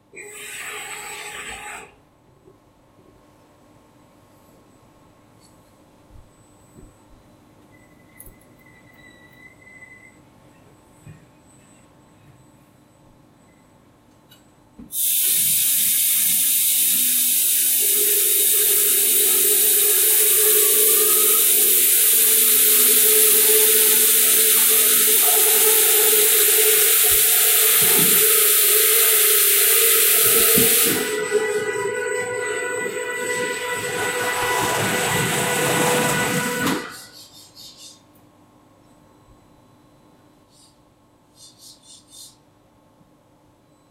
Laser Machine Diagnostic Start Up
low, Machinery, machine, engine, Factory, Rev, motor